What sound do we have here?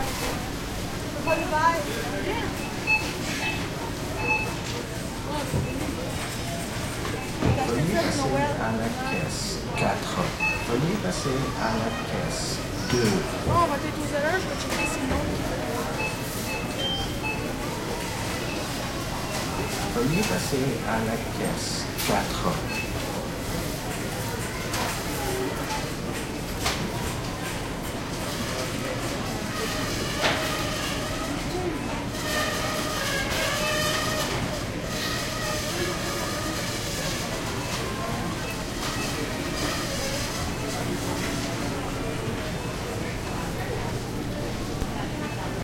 mega chain store at cash quebecois voices and french PA Montreal, Canada
store, chain, Canada, Montreal, mega, cash, PA, french, voices, quebecois